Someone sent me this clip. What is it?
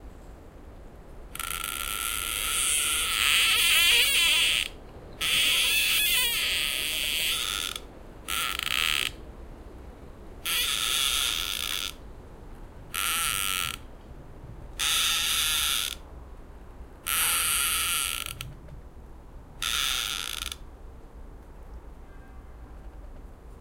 grincement de deux arbres forêt près d'Angers un jour de tempête
two trees creaking recorded in a forest near Angers, France by a stormy day
forest, tree, creak, wood